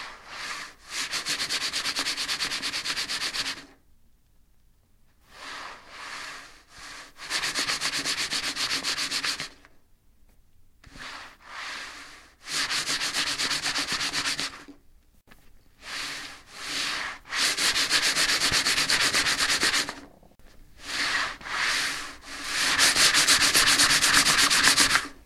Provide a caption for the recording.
Scrubbing Table
A piece of leather moved across a rough wood surface.
rag, table